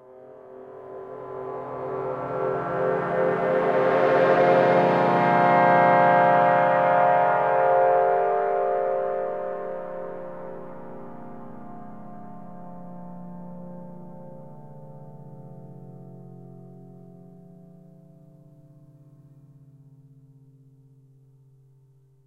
trombone pitch transformation sample remix